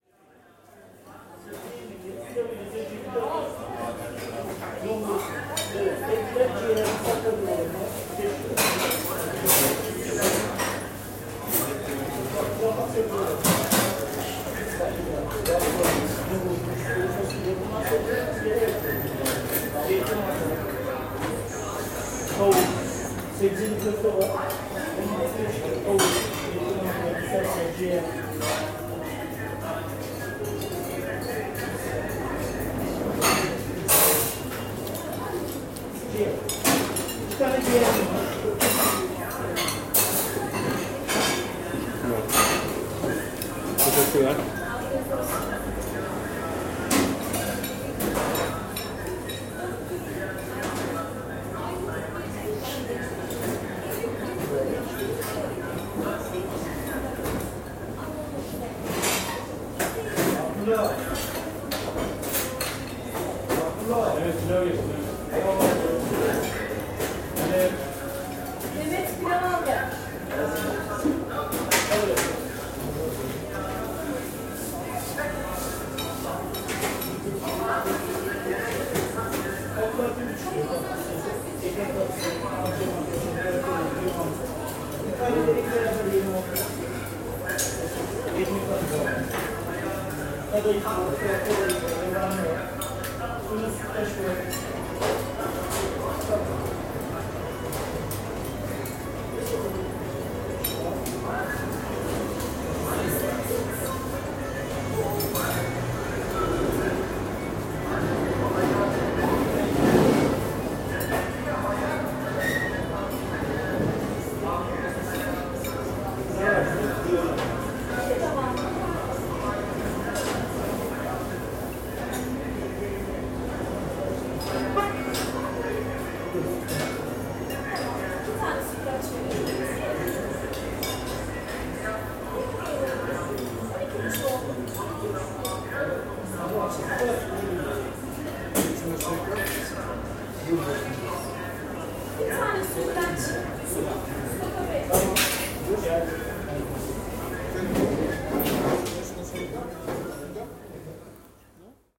somwere in Turkey sitting in a cafe and listening to the sounds....:)